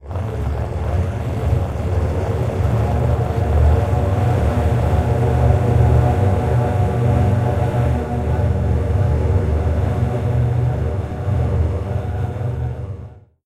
Creature Voice Mantra
A group of voices, it is not clear what they want. Probably something for the greater good... of evil! Own voice recording and Granular Scatter Processor.
Recorded with a Zoom H2. Edited with Audacity.
Plaintext:
HTML:
action, video-game, role-playing-game, rpg, voices, game-sound, ghosts, transition, dark, game-design, fantasy, scary, adventure, feedback, sci-fi, horror, creepy